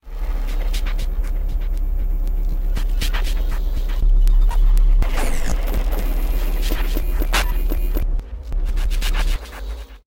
"beat" made form circuit bent furby and grain delays.
circuit, bent, furby